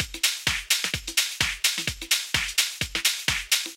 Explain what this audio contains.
Funky House 4 128 (Background Loop)
A drum loop in the style of funky house at 128 beats per minute.
128, 128BPM, BPM, drum, electronic, french, funky, house, loop